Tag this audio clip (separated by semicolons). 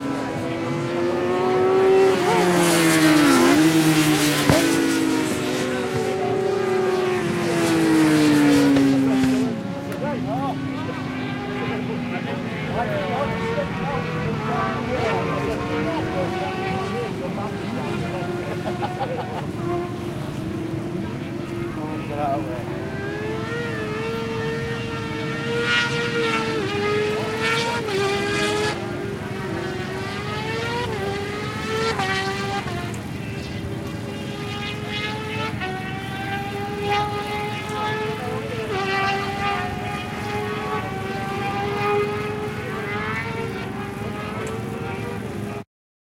Atmosphere; Bikes; Drive; Field-Recording; Outdoors; Race; Racing; Sport